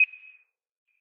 Short beep sound.
Nice for countdowns or clocks.
But it can be used in lots of cases.
beep, beeping, bit, computer, counter, d, digital, hit, menu, select